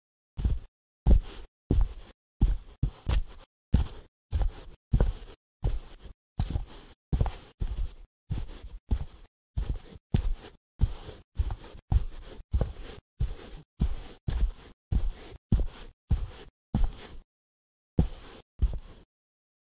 Footstep Sand
Footsteps recorded in a school studio for a class project.